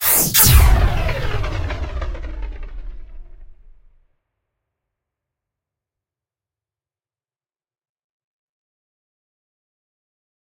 Plasma cannon shot 1-2(3lrs,mltprcssng)
The sound of a sci-fi plasma cannon shot. Enjoy it. If it does not bother you, share links to your work where this sound was used.
blaster, cannon, cinematic, cosmic, discharge, effect, fantastic, firing, future, fx, games, gun, laser, machine, military, movie, noise, pistol, plasma, rifle, sci-fi, sfx, shooting, shot, sound, sound-design, sounddesign, space, war, weapon